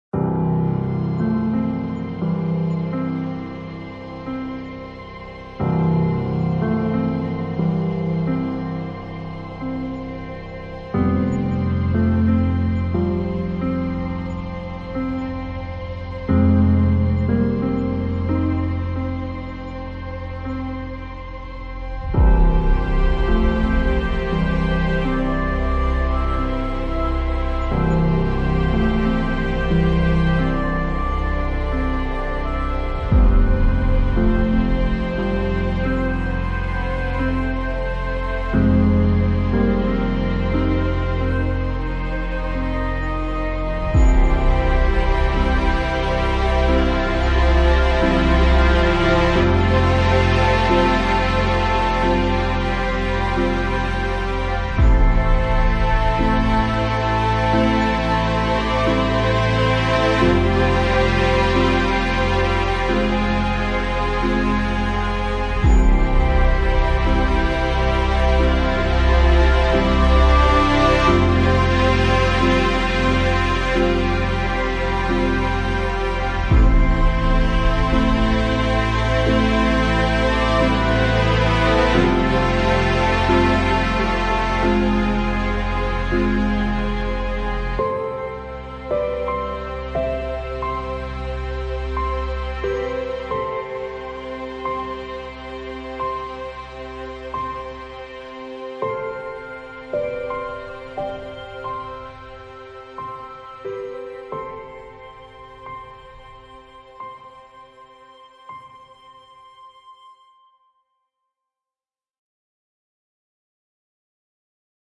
Orchestra Music
Genre: Orchestra
Track: 61/100
Percussions, String, Bass, Orchestra, background